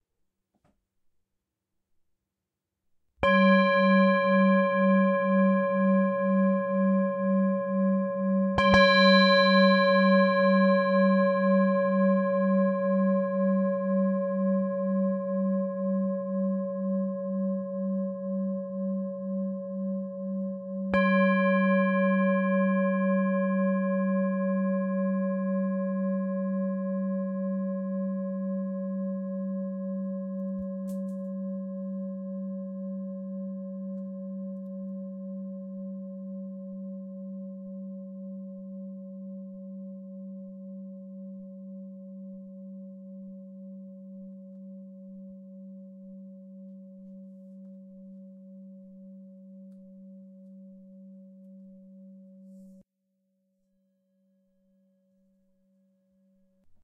Himalayan Singing Bowl #38
Sound sample of antique singing bowl from Nepal in my collection, played and recorded by myself. Processing done in Audacity; mic is Zoom H4N.
chime, bowl, bell, meditation, metallic, harmonic, singing-bowl, brass, drone, hit, tibetan, ding, strike, tibetan-bowl, ring, clang, bronze, metal, ting, percussion, gong